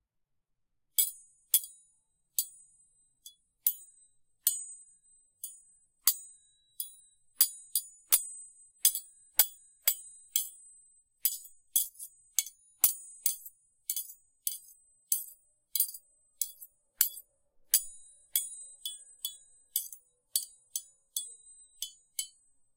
Clanking Spoon
spoon clanking on linoleum floor